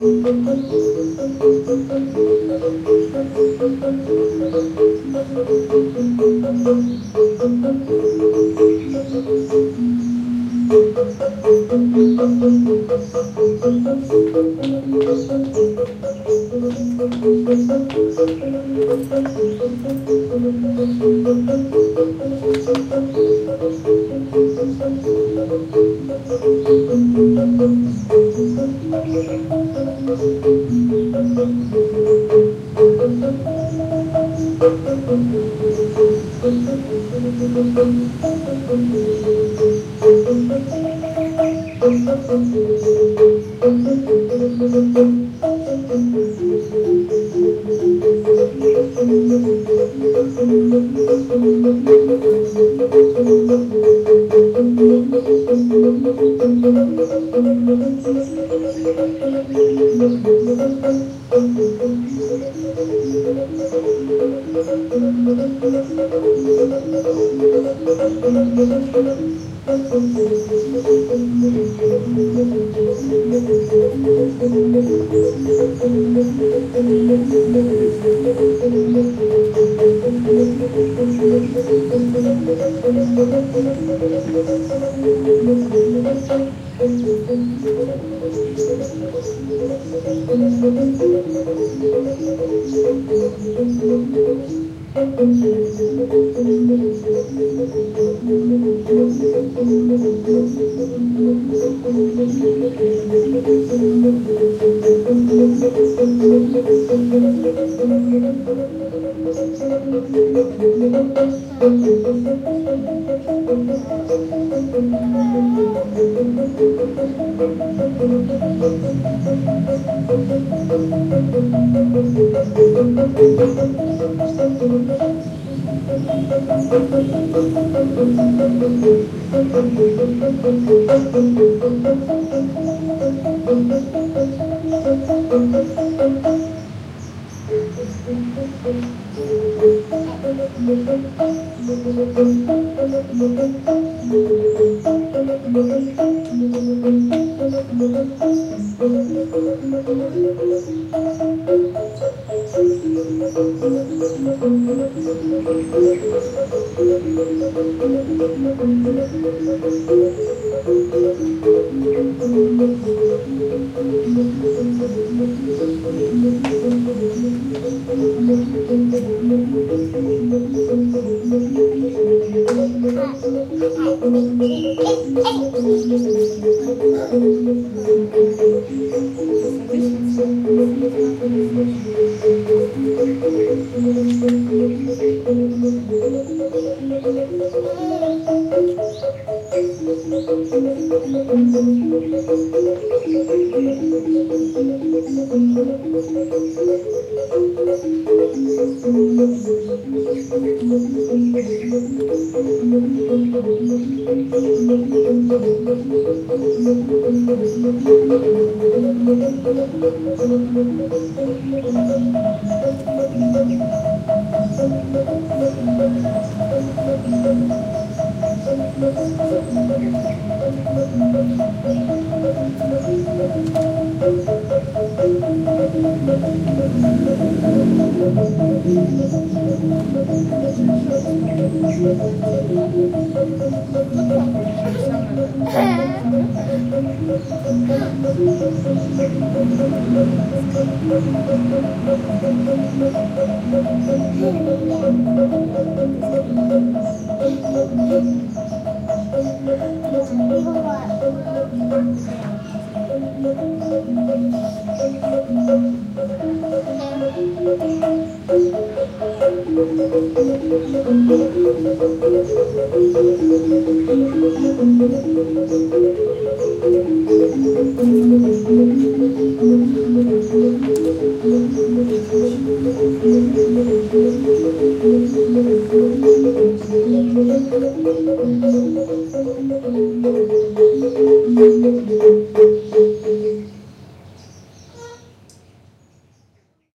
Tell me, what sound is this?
Angklung (xylophone) - Bali
Angklung (traditional Balinese bamboo xylophone) recorded in the Neka garden, Bali, Indonesia
Sundanese, percussion, gamelan, Indonesia, traditional, Asia, angklung, keris, bamboo, Neka, Bali, music, xylophone, field-recording